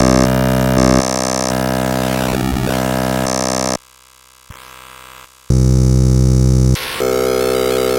Bend a drumsample of mine!
This is one of my glitch sounds! please tell me what you'll use it for :D
droid, artificial, cyborg, command, bit, failure, computer, space, game, experiment, machine, spaceship, rgb, android, Glitch, robot, drum, error, virus, robotic, console, databending, art, system